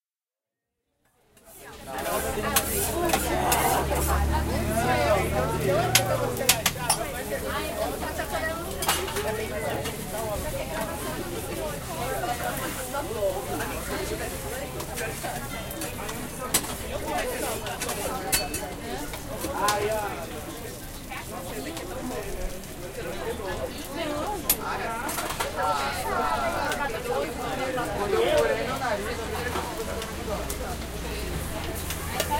LANCHONETE TRAILLER
som de lanchonete de rua
lanchonete, externo